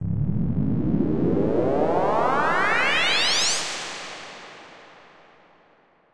A request from "zeezack" for a teleportation sound. This is an element of the final version (fx379) - a telephone buzzing noise I created with an fm synth - pitched up at two different frequencies, pitched down at another - remixed and with reverb added.
synth, tones, soundeffect, sci-fi